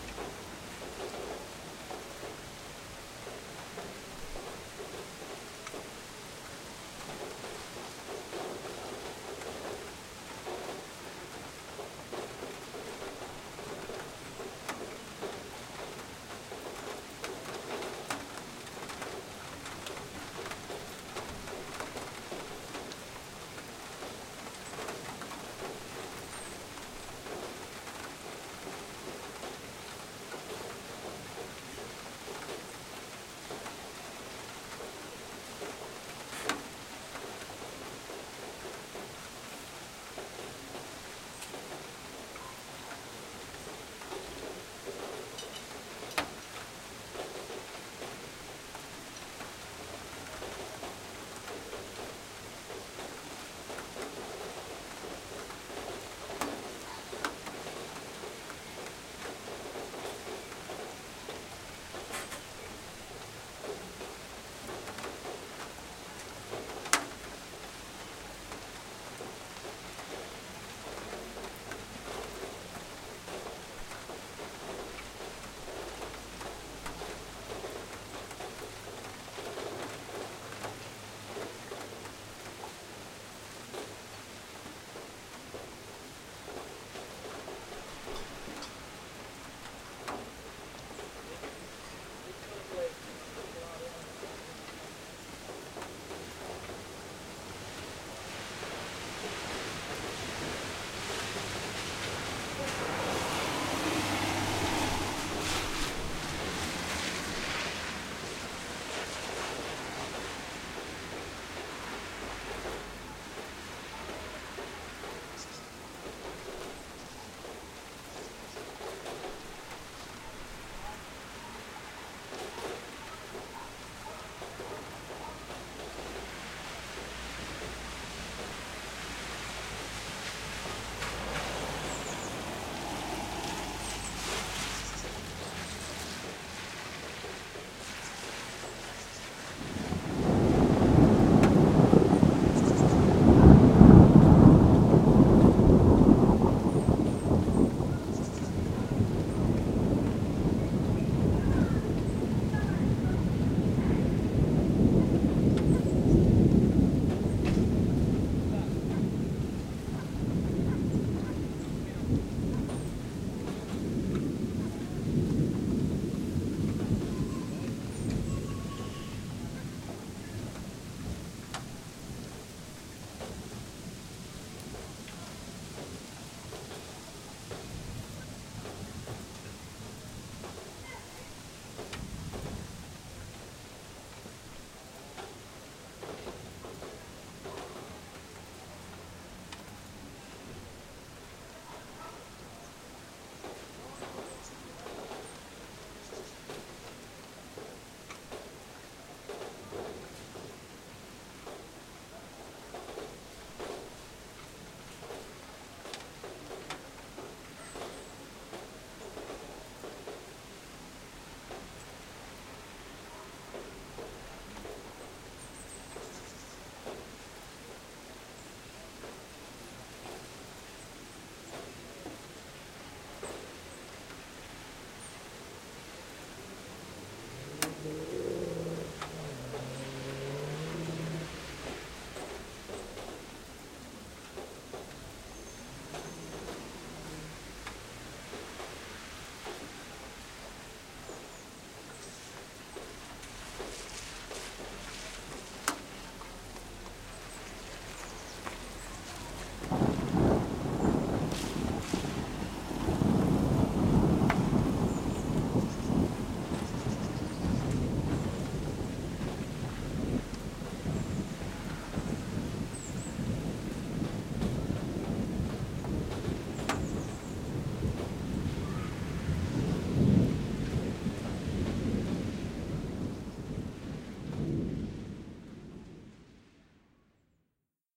Slight rain and storm (recorded from the window)
field-recording, lightning, nature, rain, rumble, shower, storm, thunder, thunder-storm, thunderstorm, weather, wind